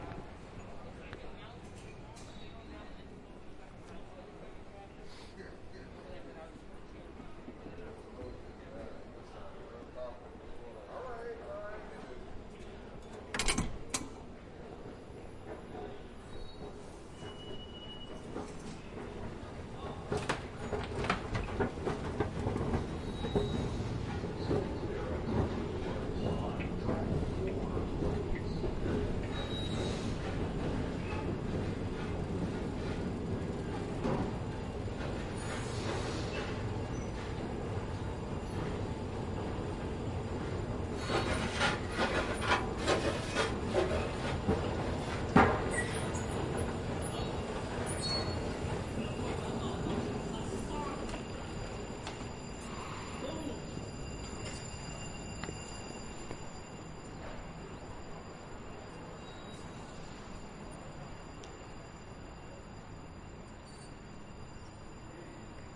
Slow Moving 1-Train Part 2 (interior ambiance)
The sound from inside a 1-train subway car, as it slowly moves through the tunnels between Chambers and Rector (heading southerly) in Lower Manhattan.
*If an MTA announcement is included in this recording, rights to use the announcement portion of this audio may need to be obtained from the MTA and clearance from the individual making the announcement.
west-side, NYC, metro, 1-train, announcement, Spanish-announcement, subway, ambiance, transit, railway-station, station, platform, departing